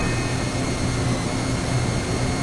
weird little fuzz drone
a fuzzy noise, sounds like digital static, with some ringing